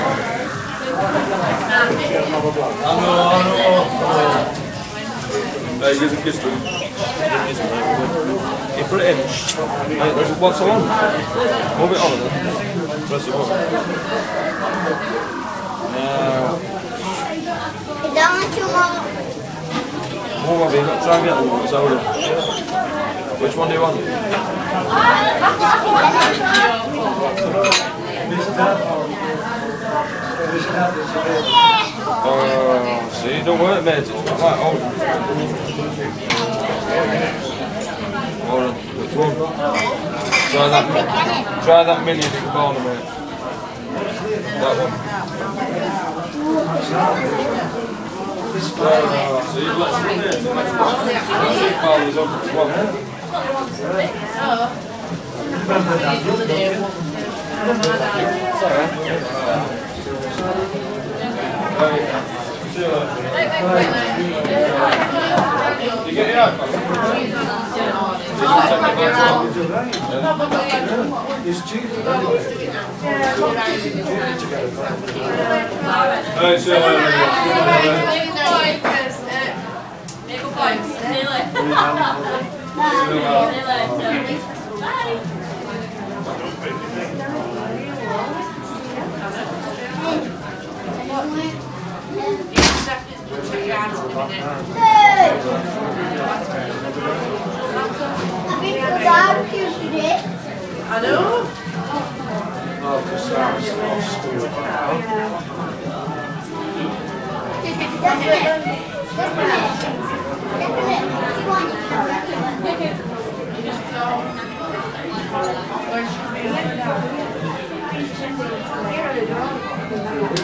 pub ambience 2
Another recording of a british pub: "The Gate" in Swinton, South Yorkshire.
chat, people, field-recording, food, talk, pub